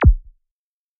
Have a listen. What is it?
ambient psy goa kick 2 (bass 65 hz)
goa, psychill, drum, kick, bass, bassdrum, bd, psybient, ambient, psy